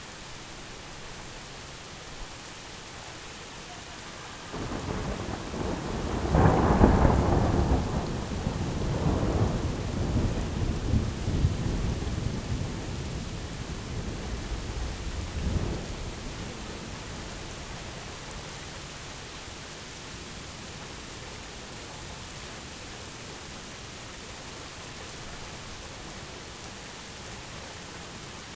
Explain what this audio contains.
Rain, Thunder, Short
field-recording, lightning, nature, rain, storm, thunder, thunderclap, weather